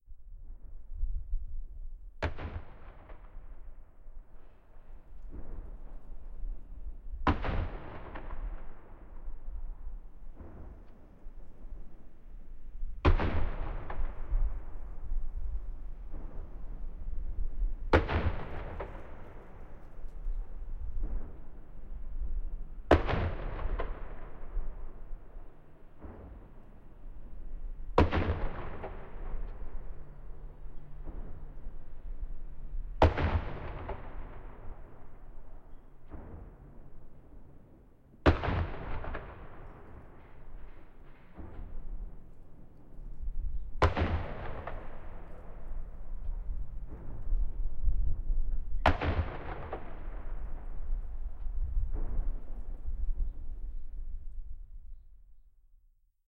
Recorded 70mm salute cannons at a distance of about a half a mile. The battery of cannons in near a river in a valley on the Hudson River.
cannon, military, explosion